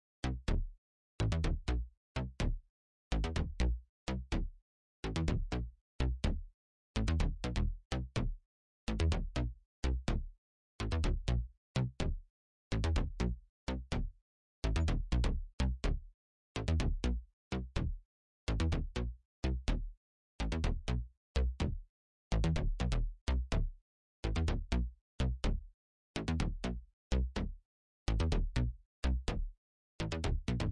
sint bass
loop, synth